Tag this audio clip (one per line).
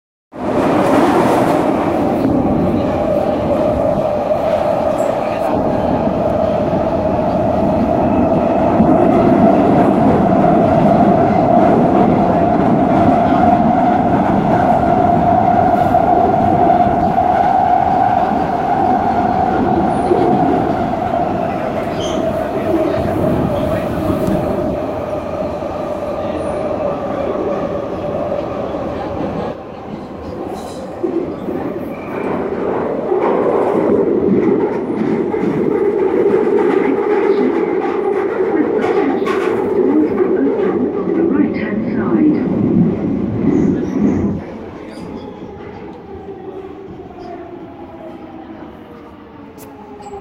London
metro
station
train
transport
tube
underground